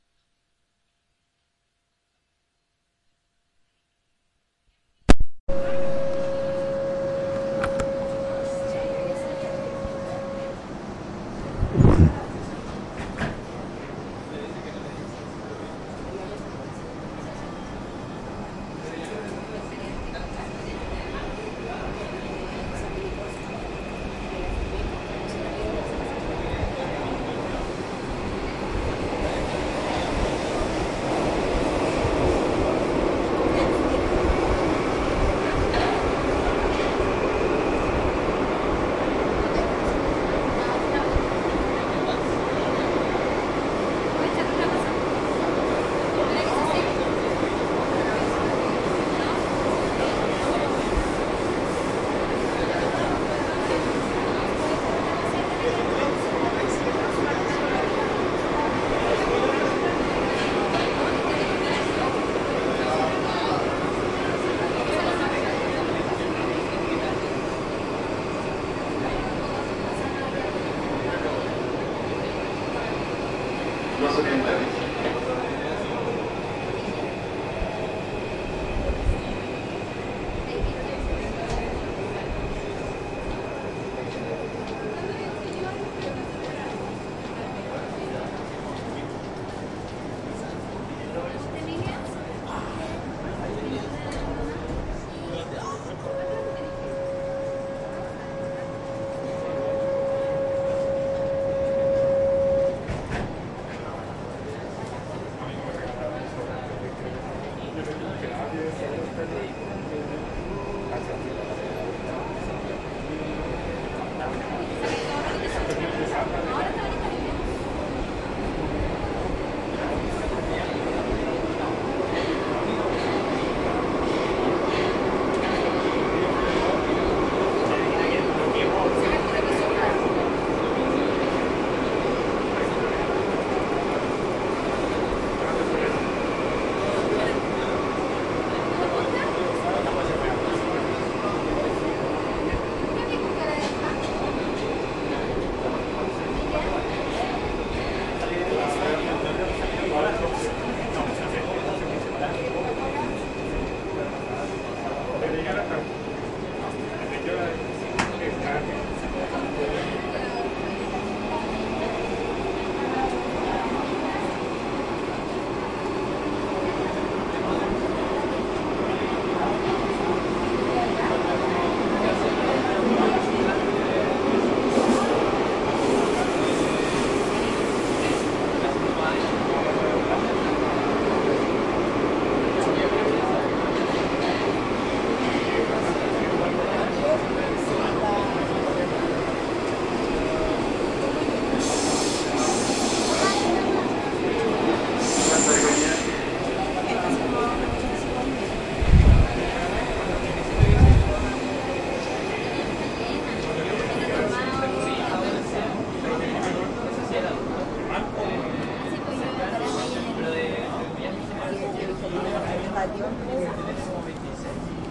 subway chile
Chile, metro, Santiago, subway